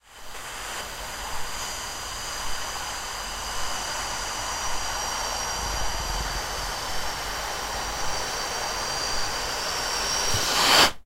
Balloon-Deflate-07-Long
Balloon deflating. Recorded with Zoom H4